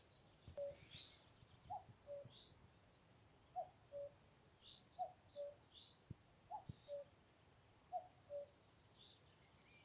Cuckoo Call
The sound of the elusive Cuckoo and a clear denotation that Spring has definitely arrived.